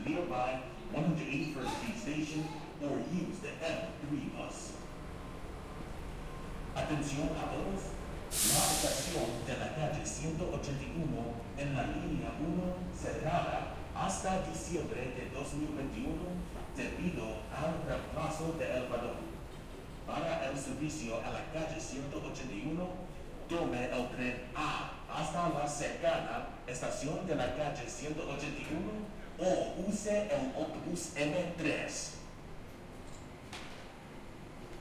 MTA Platform Announcement in Spanish
NYC subway platform, MTA announcement in Spanish (IRT line, downtown, likely Chambers Street Station).
*Rights to use the announcement portion of this audio may need to be obtained from the MTA and clearance from the individual making the announcement.